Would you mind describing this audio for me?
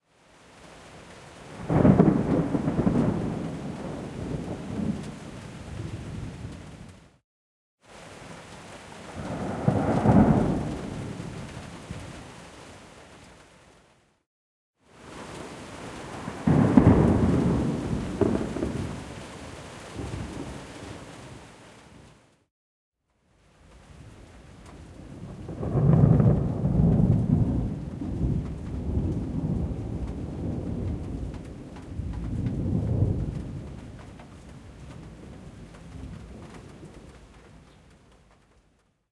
Thunder - Rain - Metal Roof
Thunder during rain in July. There are sounds of drops on a metal roof.